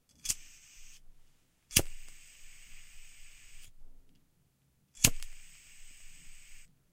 noise of a cigarette lighter, recorded using Audiotechnica BP4025, Shure FP24 preamp, PCM-M10 recorder
zippo, gas, tobacco, disposable, smoking, flame, lighter, clipper, collection, cigarette